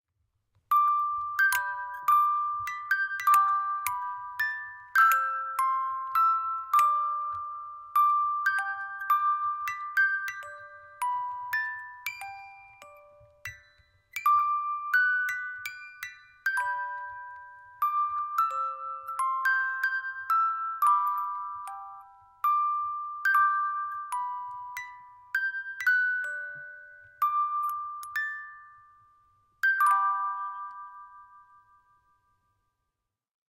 Limiter on: Hand Crank Music Box Amazing Grace
Old-Fashioned Hand-Crank Music Box - hymn Amazing Grace.
Music box rested on 3in x 5in piece of wood.
Audio with a "Limiter" on it, the other file had loud clicks, which were caused by the mechanism of the music box. This version uses a "Limiter" so the clicks are less noticeable.
Recorded in Learning Audio Booth
Microphone
USB Yeti mic, by Blue.
Music box image